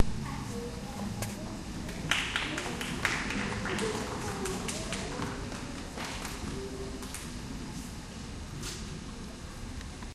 raw recital applause lame
Raw unedited sounds of the crowd in a auditorium during a Christmas recital recorded with DS-40. You can edit them and clean them up as needed.
audience, crowd, applause, auditorium